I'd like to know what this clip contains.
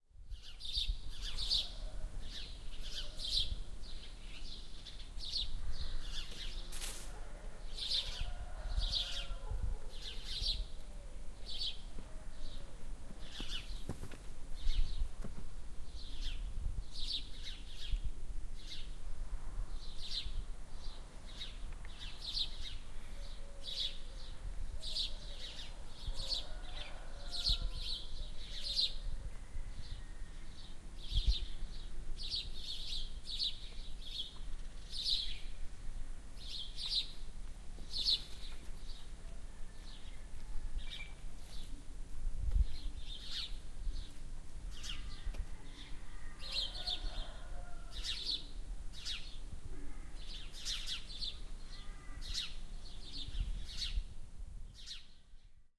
Albergue A Pedra, 7:30AM
30/7/2011 - First day
Recording of the early morning ambience in front of Albergue A Pedra (Sarria, Galicia, Spain) before starting our first day walking of Camino de Santiago.
The recording was made with a Zoom H4n.
birds, cock-a-doodle-doo, early-morning-ambience, field-recording, rooster